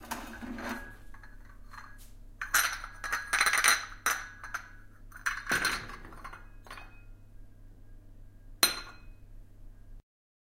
Holding a cut of tea with shaky hands, it sounds a bit like a nervous person.
hands, shaky, holding, liquid, cup